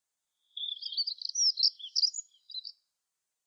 Bird chirping
Bird, Birds, Morning, Nature